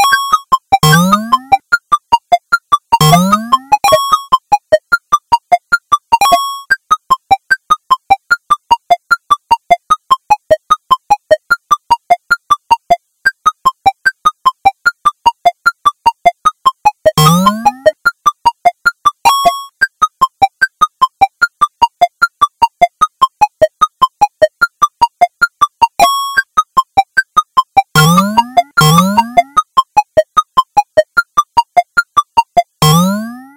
a Mario - style game with jumps, coin, and background music sounds.